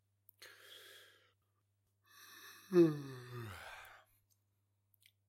SFX Player Action: Yawn One
A person yawning
player, tired, yawn, yawning